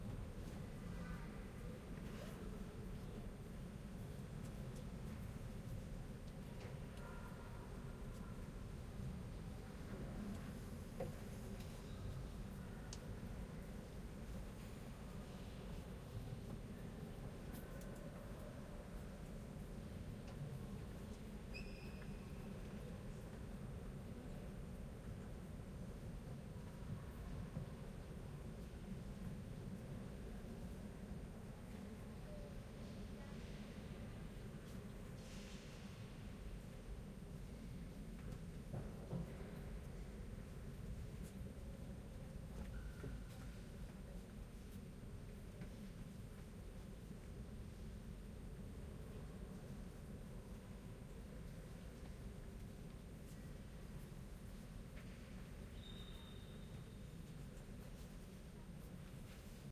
cathedral echo
Echoing silence in the Cologne cathedral before prayers begin, sounds of people sitting and walking around, mostly tourists. OKM Binaurals, Marantz PMD 671.
cathedral, church